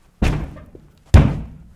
banging screen door